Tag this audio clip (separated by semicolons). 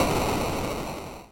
8-bit; abstract; arcade; artillery; bang; battle; bomb; boom; cannon; classic; detonation; eightbit; explode; explosion; explosive; grenade; gun; impact; lo-fi; missile; projectile; retro; shooting; shot; video-game; weapon